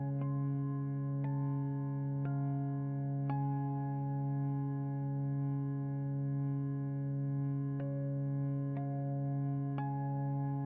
a drone and guitar harmonics on top